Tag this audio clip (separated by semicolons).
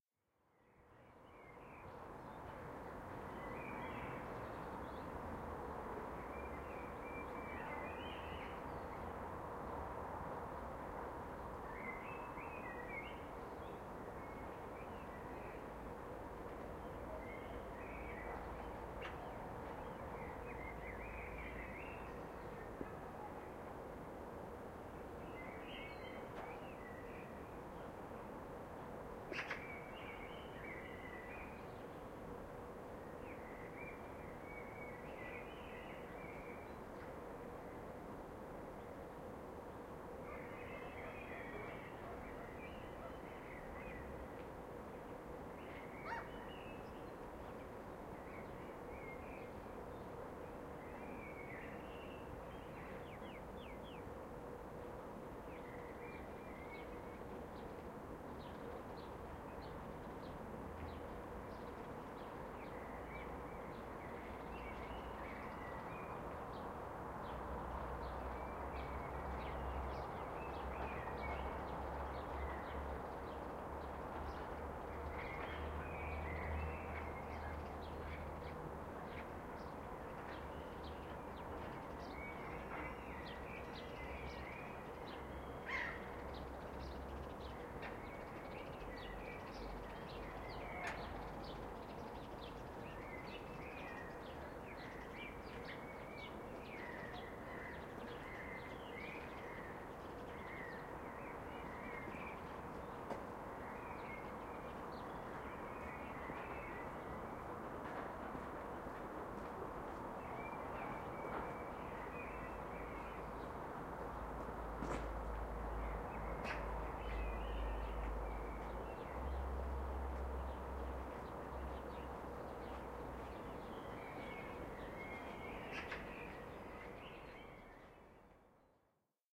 city; field-recording; projects; suburb; ambiance; terns; ambience; airplane; blackbirds; traffic; spring; birds